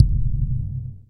FD808A Bass Drum
drum hits processed to sound like an 808
drums, drum, 808, machine, kick